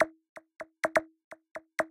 tec2011 3-126bpm
Minimal techy loop @ 126 bpm.
126bpm, loop, minimal, percussive, sound, tech, techno